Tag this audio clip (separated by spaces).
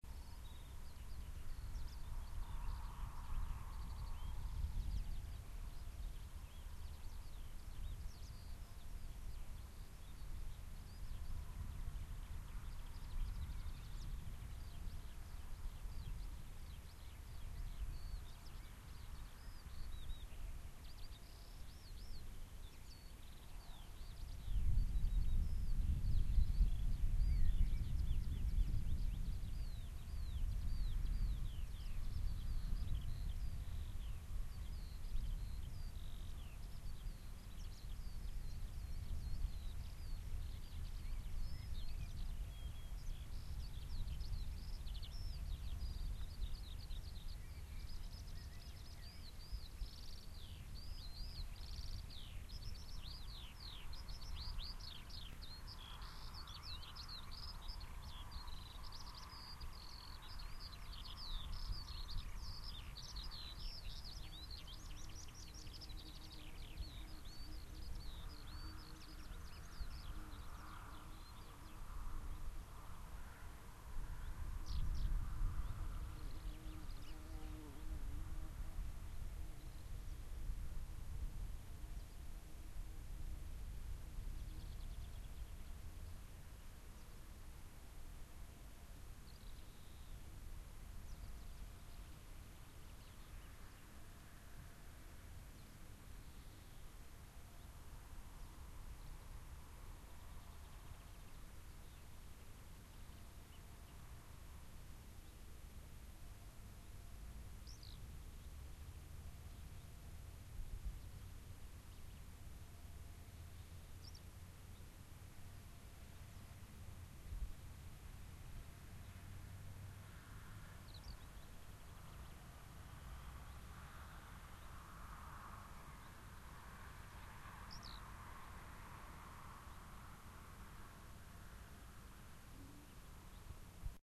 soundscape ambience nature